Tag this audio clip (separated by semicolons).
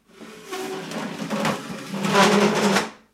dragging; wood; chair; furniture; squeaky; floor; tiled